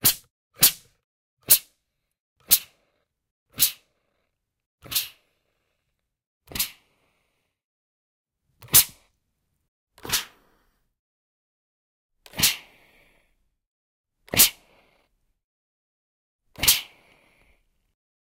Household - Cloth Iron Spray
Recorded the steam spray thing on an iron close up
Spray, Steam